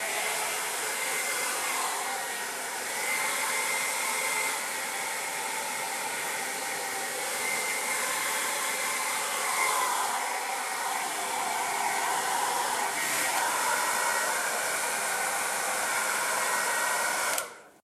hair dryer, stereo sound.
Thank you for the effort.
blow, dryer, hair, noise, sound, stereo